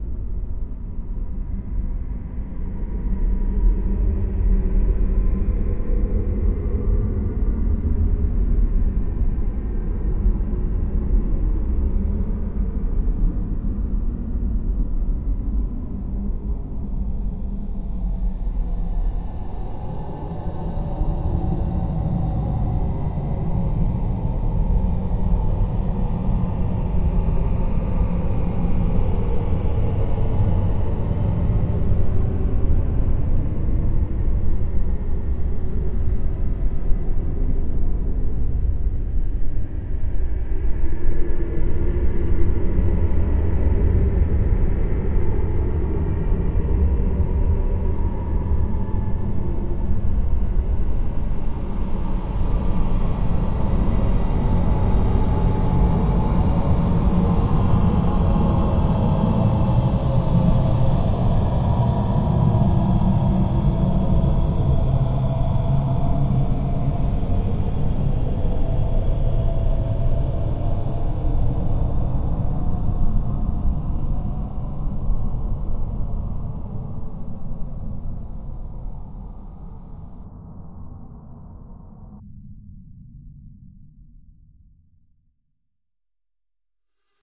Drone DarkEmptiness
A huge, dark, airy drone with lots of slowly churning movement to it. Made with Native Instruments' Metaphysical Function.
Note: you may hear squeaking sounds or other artifacts in the compressed online preview. The file you download will not have these issues.
rumble, low, huge, ominous, deep, drone, suspense, thriller, big, horror, scary, dark